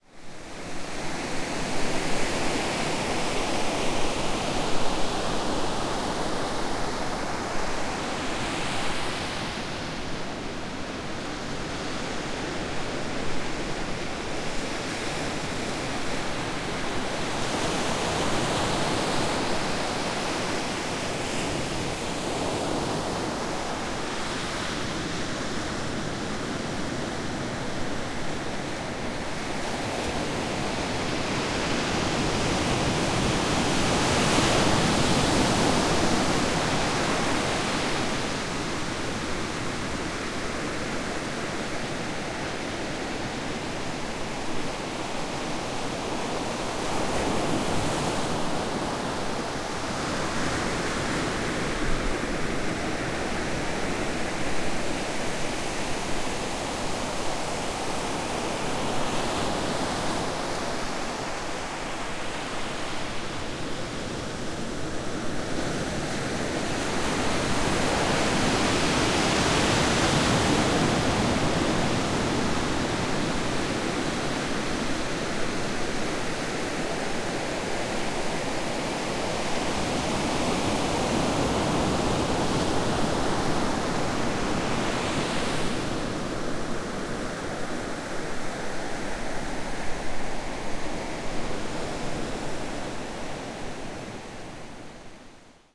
A stereo recording of the Pacific Ocean.
beach, breaking-waves, coast, ocean, pacific, pacific-ocean, sea, sea-shore, seaside, shore, surf, wave, waves